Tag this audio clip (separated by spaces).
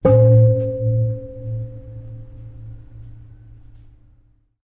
impact metal mallet clang